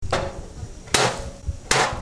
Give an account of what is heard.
Martillon abre tesoros
Al golpear un tesoro este se abrira
Golpe, Martillo, juego, Video